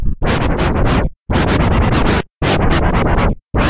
These samples made with AnalogX Scratch freeware.